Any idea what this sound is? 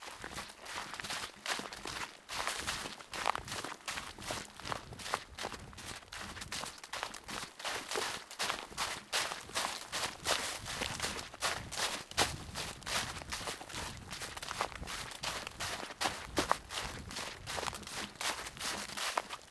Footsteps / walking fast on wet gravel (1 of 3)
walk, wet, walking, footsteps, feet, gravel, ground, steps, foot